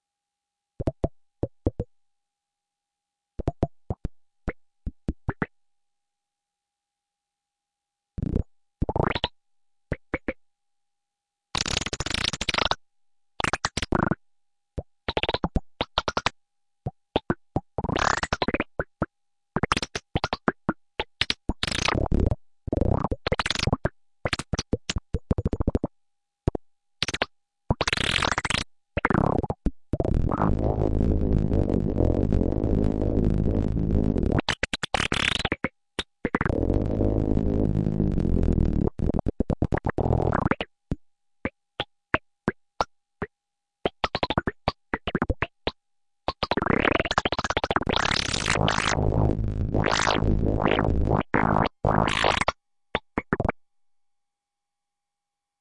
SciFi Retro Oscillators TimeStunts 03
Listening to some liquid robots talk.